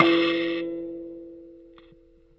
44kElectricKalimba - N1harmonic
Tones from a small electric kalimba (thumb-piano) played with healthy distortion through a miniature amplifier.